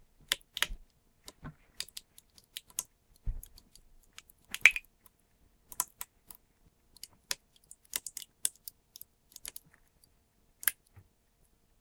Me crushing a soda can with a seat clamp.